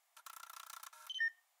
Samsung SL50 beep - can't focus